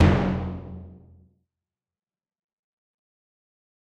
A clean HQ Timpani with nothing special. Not tuned. Have fun!!
No. 19